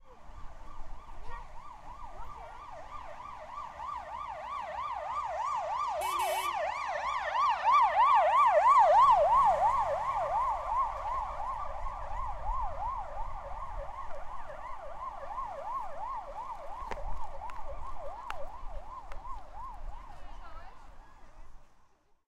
ambulance, street, traffic, city, Poland
city, Poland, traffic